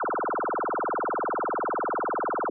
Laser Machine Gun
Laser 1 (repeating 50 times)
battle, game, gun, laser, machine, movie, robot, sci-fi, space, war, weapon